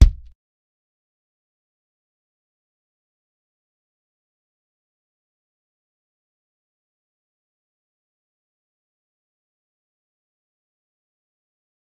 Taye Bass Drum
Punchy Bass Drum Hit
Bass, Drum, Punchy, Taye